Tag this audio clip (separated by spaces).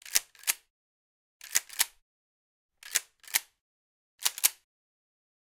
load cock action mossberg-12-gauge gun mossberg 12-gauge weapon rifle reload shotgun pump-action mossberg-12-bore